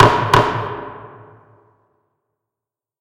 Judge is not pleased and demands silence.
Used reverb and delay effects. Edited with Audacity.
Plaintext:
HTML: